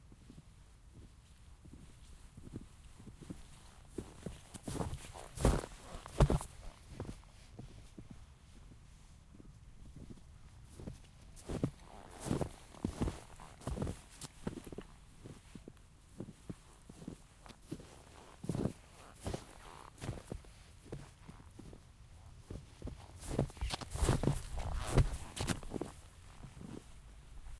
Walking in snow #2
In this field-recording you hear someone (well, me) walking through snow. You hear the typical crunchy sound of snow and the leather boots squeak too.
Since I have little knowledge about improving field-recordings I uploaded the files as they were, only cut off the switching on and off of the recorder (ZOOM H2N).